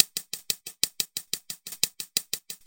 hi hat loop